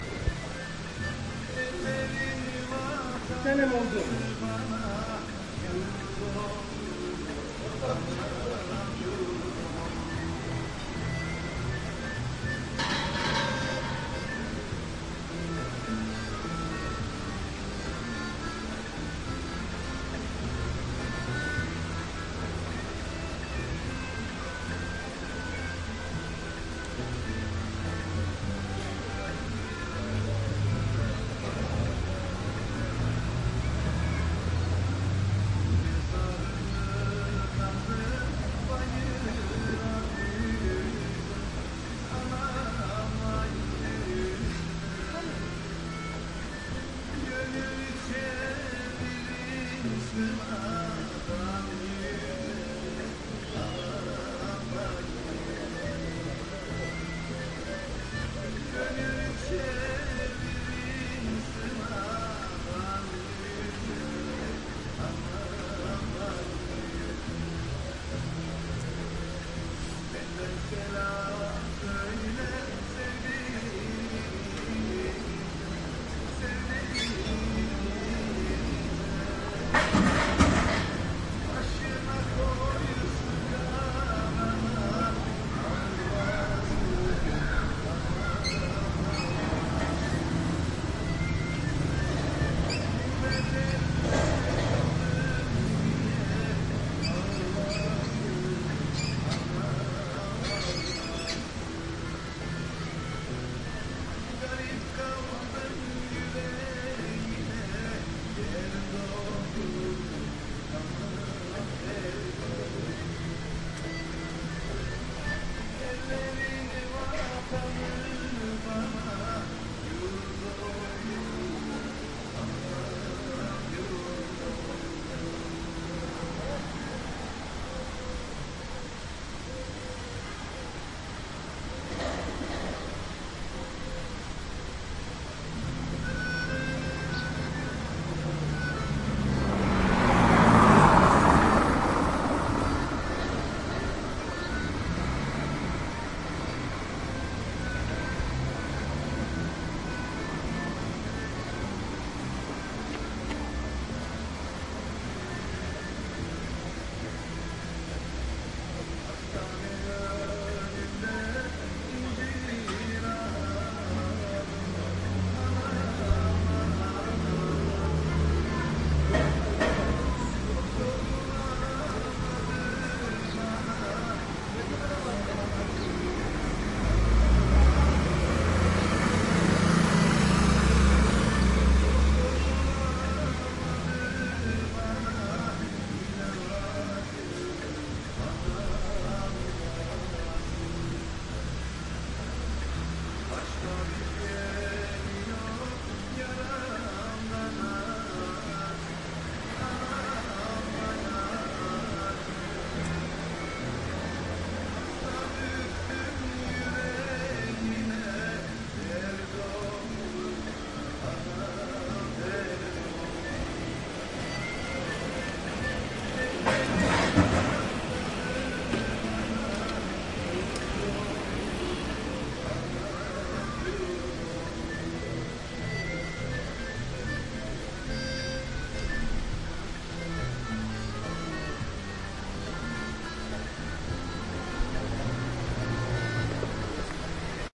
belek kylä fs
Sounds from village Belek Turkey near Antalya. RecordedOct 23th 2008 with ZoomH2 recorder. You can hear radiomusicfrom barbershop , small fountain, some cars and bicyclespassing by.
belek, october, turkey, village, zoomh2